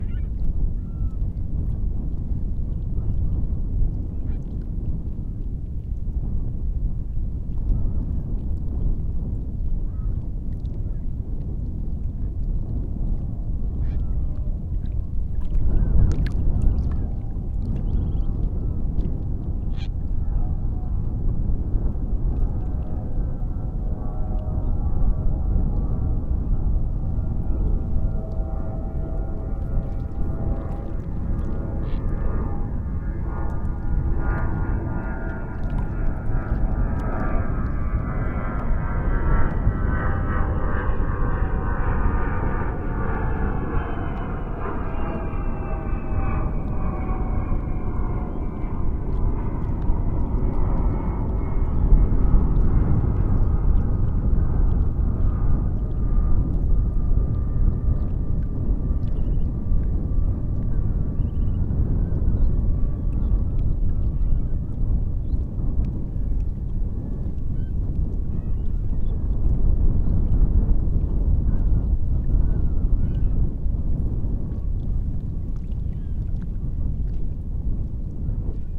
Three Worlds 00B
Water, wind, and birds near Alviso, CA, USA. Recorded April 24, 2012 using a Sony PCM-D50 hand-held recorder with built-in microphones. This is entirely raw except for token normalization and truncation: the file begins and ends at zero crossings so it can be played as a loop.